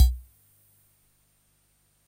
Samples of the vintage Korg Mini Pops 45 drum machine. No processing applied here but a very gentle fft based noise reduction. After all, I figured background noise was part of the machine's sonic identity. No patterns here, since that would have taken forever, just the individual sounds. Some of them alone, some of them combined.
Recorded mono with a zoom H2N thru the machine's "amp" output.